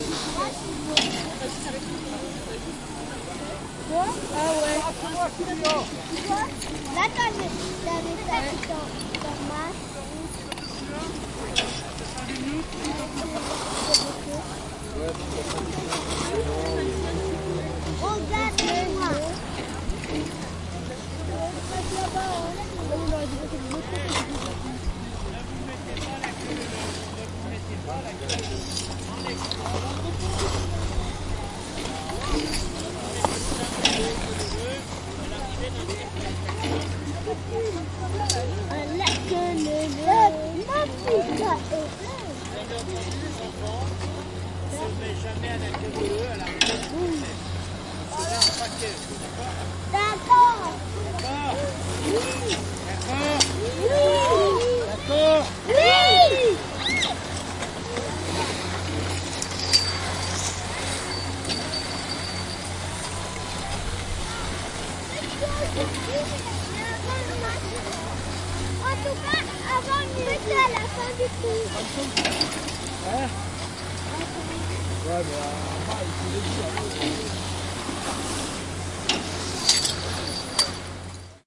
Field recording of the arrival zone of a platter lift at a ski resort in the french Alps. Mechanical noises, cable impacts, distant voices speaking french. Recorded with a zoom H2 in X/Y stereo mode.